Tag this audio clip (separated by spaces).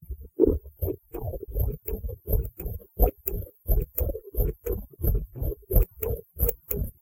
scissors cutting